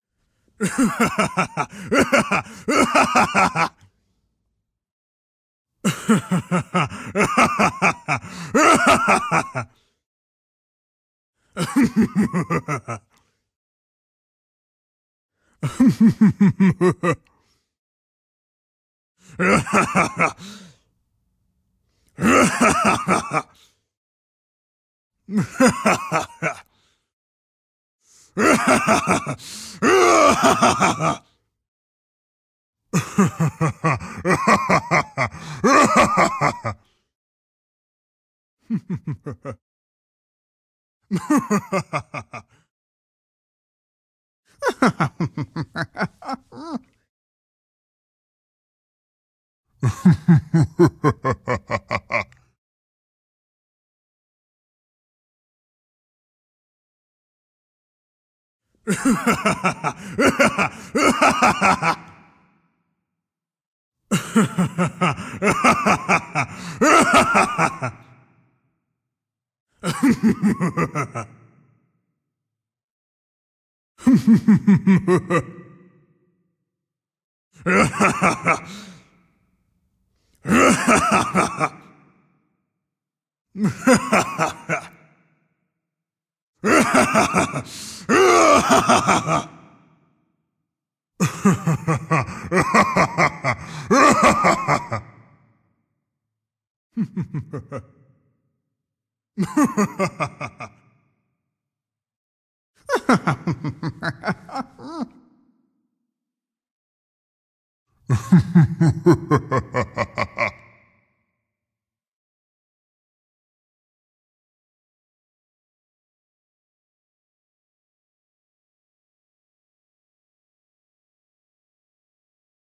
My stab at a maniacal bad guy laugh.
Rode NTG-3 inside of a fort made of bass traps.